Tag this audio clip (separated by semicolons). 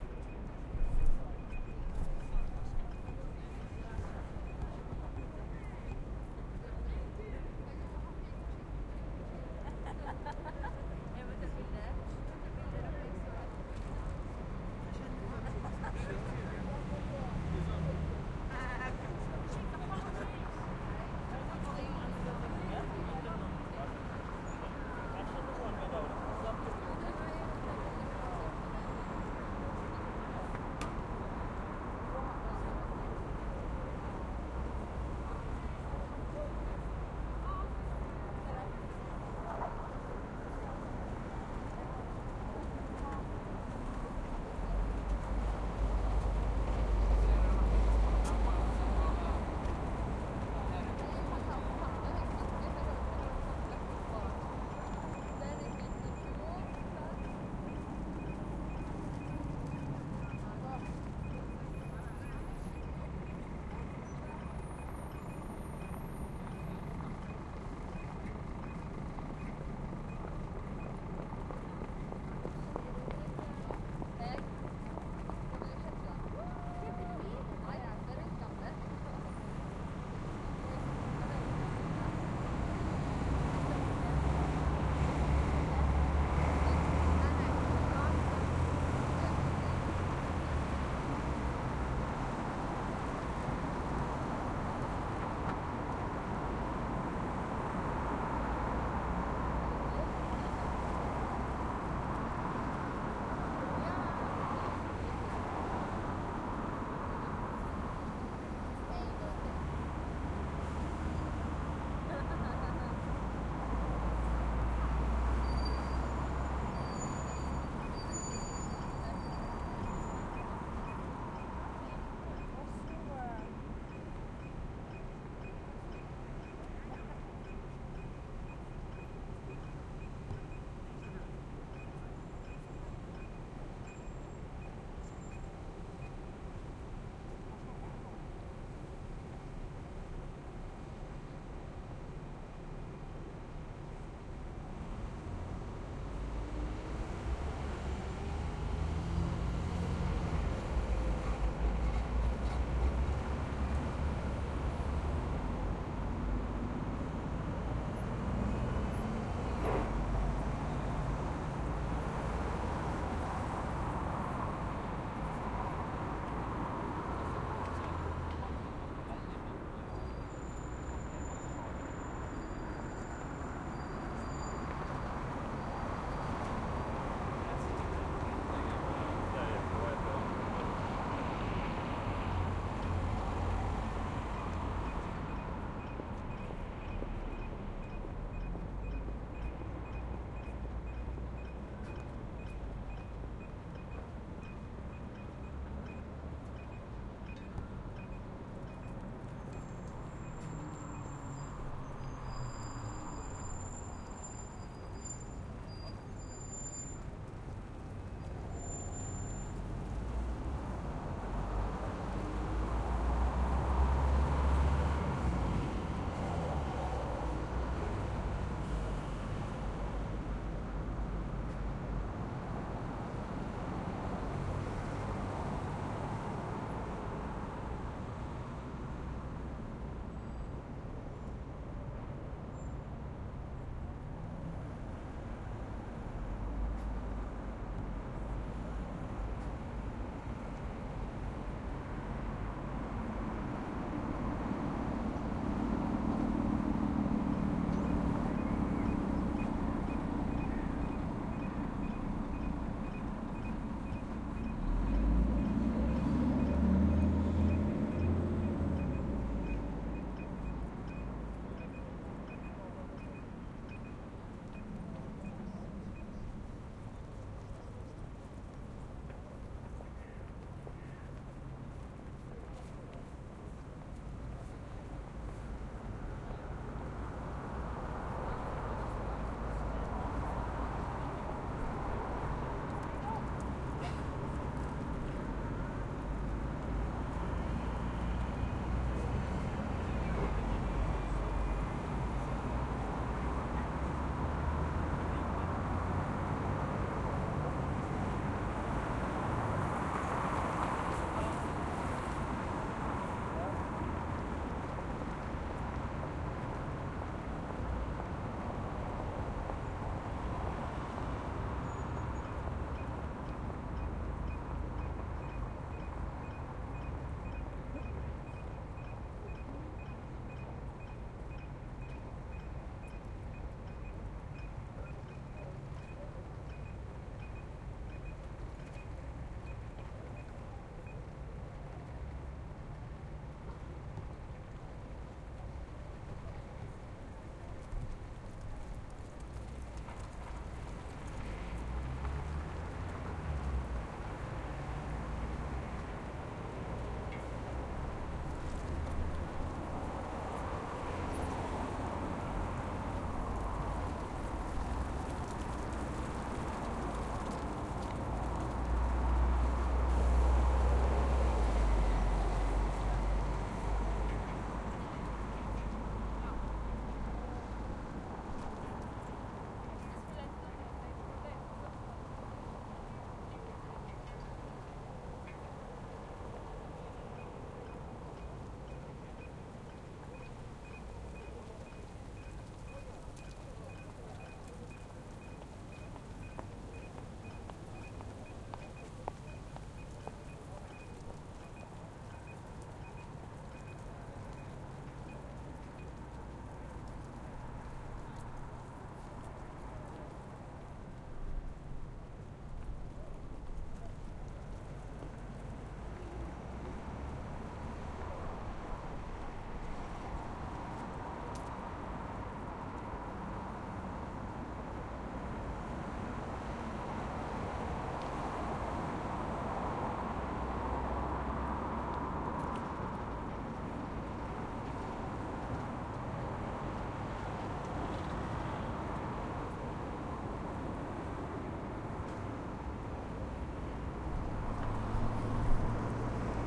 4-channel; Bergen; Soundfield; SPS200; traffic; surround; crowd; field-recording